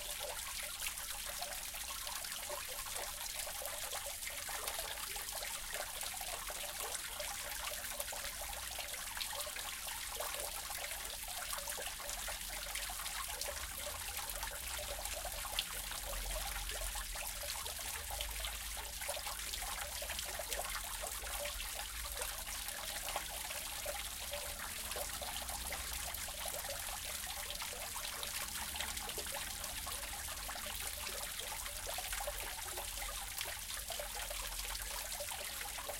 A fountain in Alfama district of Lisbon.